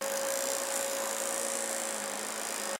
Vacuuming my living room
carpet, suck, vacuum